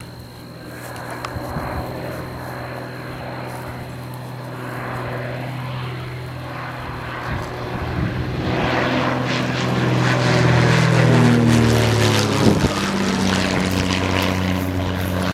Audio taken from a video file I recorded on the set of a World War II film.